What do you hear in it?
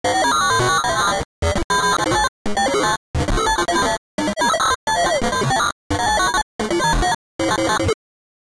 Bending Voice
Made with dblue Glitch VST and some other
voice, glitch, alien, bending